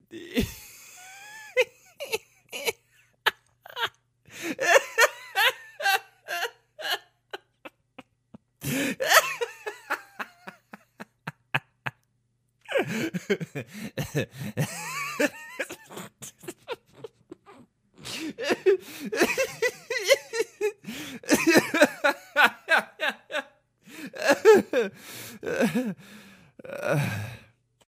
Man laughing hard
Man laughs his lungs out
funny, happy, hard, laugh, man